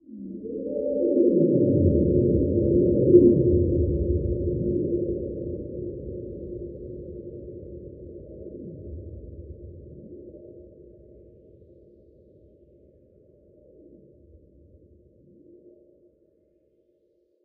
digifishmusic Eastern Whipbird 4X Slower deepspace17-rwrk
remix of "Eastern Whipbird 4X Slower" added by digifishmusic.
slow down, edit, delay, filter, reverb
ufo, fx, sci-fi, ambience, score, reverb, backgroung, remix, pad, abstract, astral, bird, soundesign, delay, ambient, space, processed, alien, atmosphere, soundtrack, sky, effect, film, air, electro